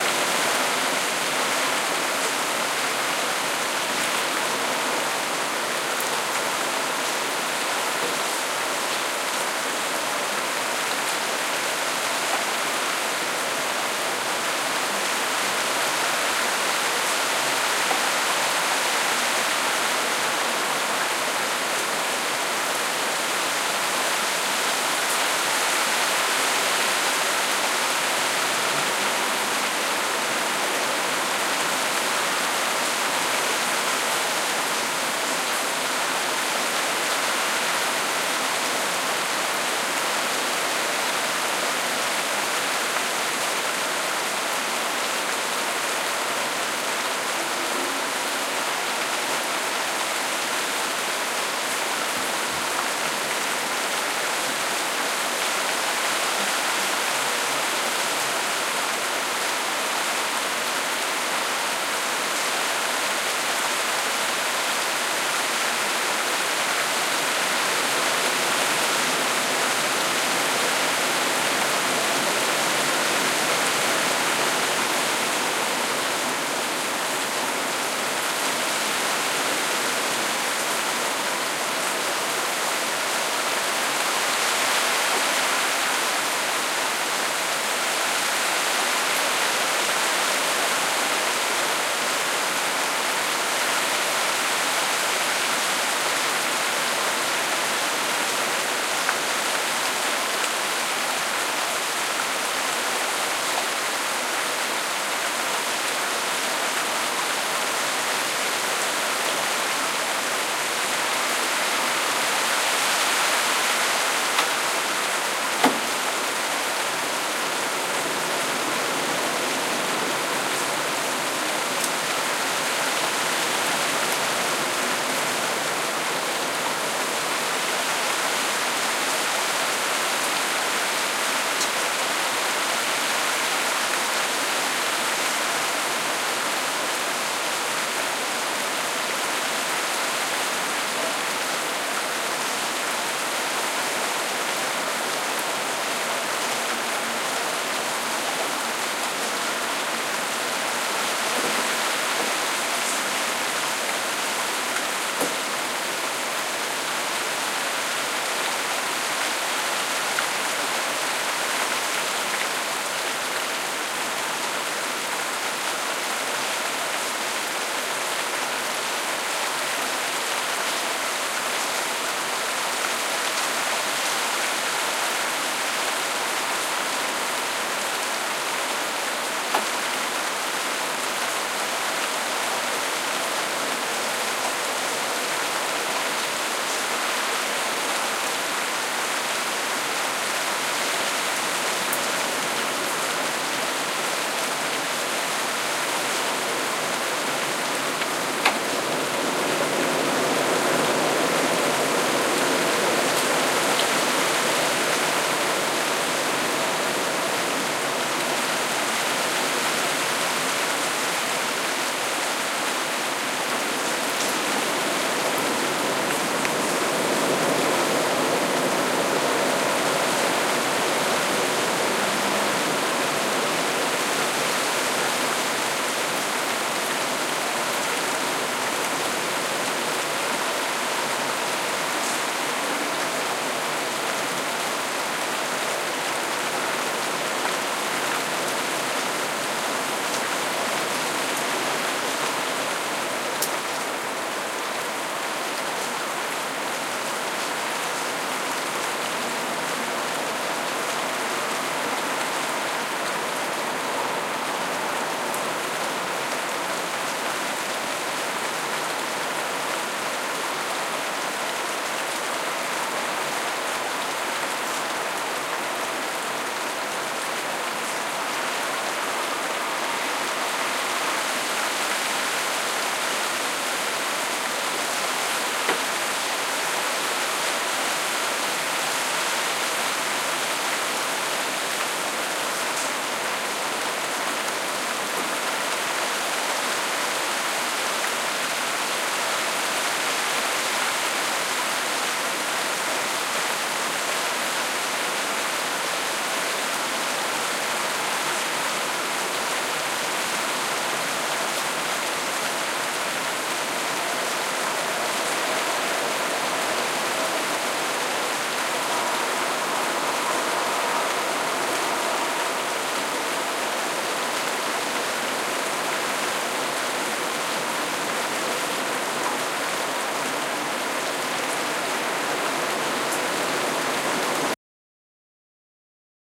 Heavy rain outside my balcony
stormy, nature, heavy